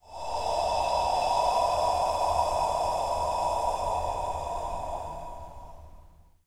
Breathy ooohhh
I needed some spooky sounds for my Dare-16 entry.
Recorded some breathy sounds. I used them with lots of reverb, but here are the dry versions, so you can apply your own effects.
Zoom H1, built in mics.
spooky, breathy, voice, Dare-16, breath, spirit, scary, vocal, ghost